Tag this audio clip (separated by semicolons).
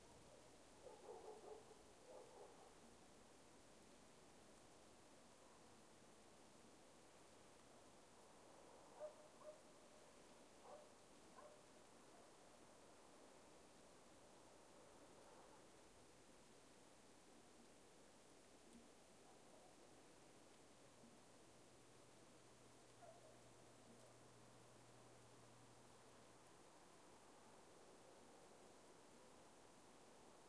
atmosphere; forest